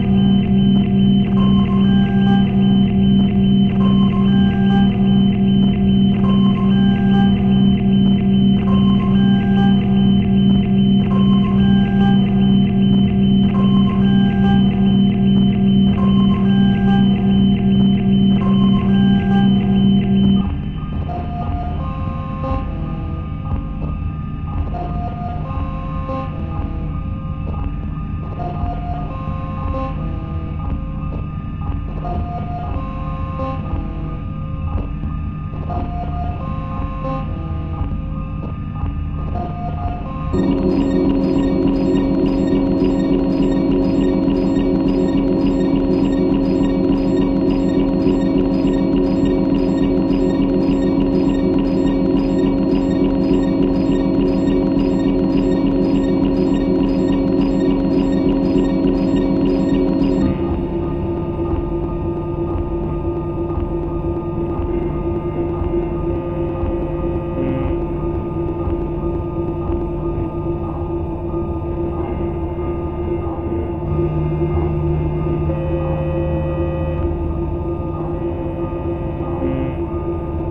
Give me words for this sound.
A collection of repeated sounds that could be generated by machines or electrical equipment. These sounds reflect futuristic or science fiction machines.
Sci-Fi, Electronic, Space-Machine, Machine